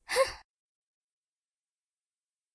Short hmm noise made by a girl/boy for video games clear and HD.